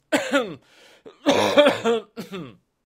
Man cough. Variant 2. ORTF-stereo.